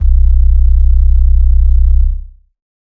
fake analog bass 2a
deep electronic bass sound
bass, electronic